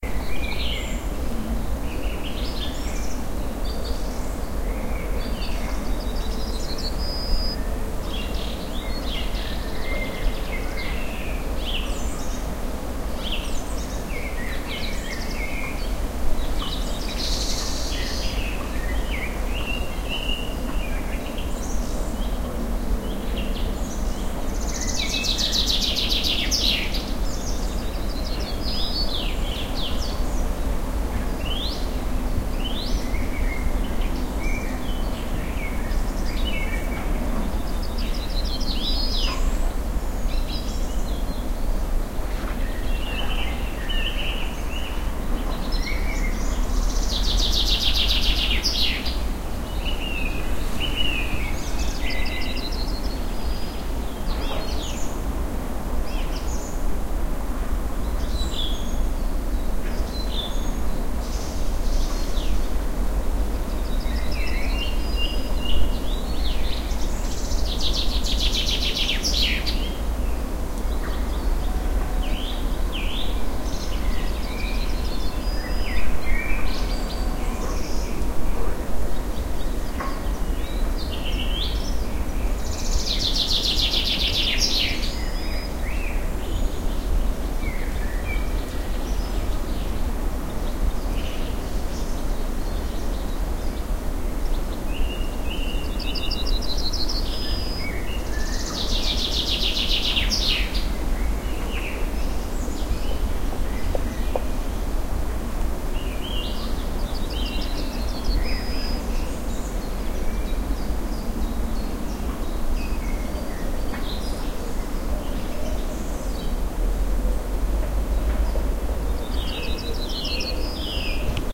birds are singing 016
Singing birds in the spring forest.
birds, birdsong, forest, nature, singing, spring